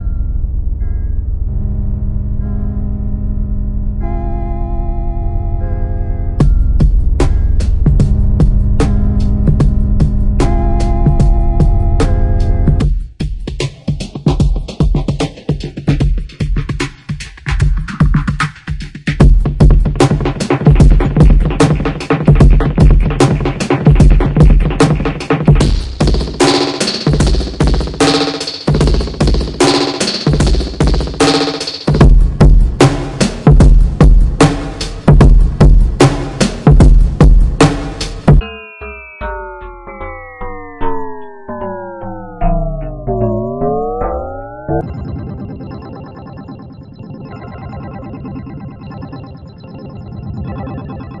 Zajo loop33 allinone-rwrk
remix of a downtempo beat added by Zajo (see remix link above) all variations in one file, (also available as individual loops)
beat, breakbeat, club, compression, construction-kit, delay, dirty, distorsion, dj, downtempo, drum, dub, electro, filter, hard, heavy, hiphop, loop, mix, percussive, phaser, phat, processing, producer, punch, remix, reverb, trip, vocoder